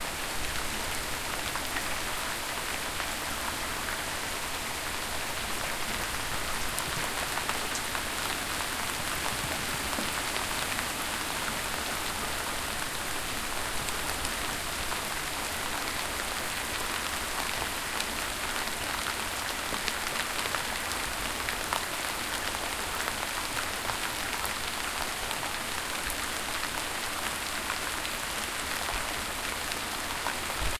Creation date: 27 - 06 - 2017
Details of this sound:
Subject of recording:
- Object : Rain
- Material : Water
- peculiarity : None
Place of capture:
- Type : Outside
- resonance : None
- Distance from source : Sheltered
Recorder:
- Recorder : Tascam DR-40 V2
- Type of microphone used : Condenser microphone
- Wind Shield : Rycote DR-40MWJ
Recording parameters:
- Capture type : Stereo
Software used:
- FL Studio 11
FX added:
- Edison : To amplify the signal